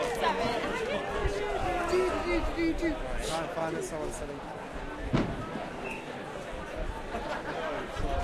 lewes - Soundbytes
Lewes bonfire night parade, England. Crowds of people dress in historic costumes and burn effigies of the pope and political leaders. Lots of bangs, fireworks going off, chanting, shouting.
bangs, lewes, crowd, fireworks, people, bonfire, noisy, march